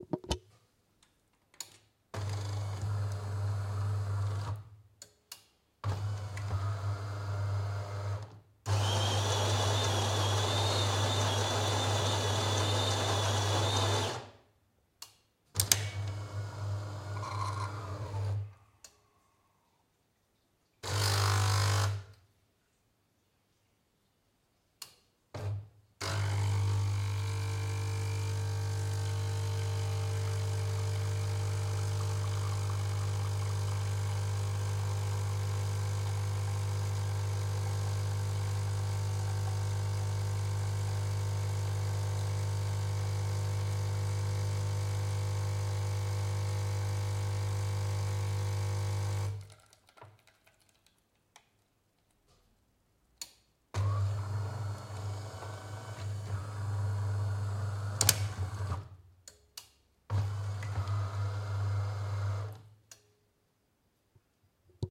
Espresso machine
Recording: Tascam DR-1
machine, espresso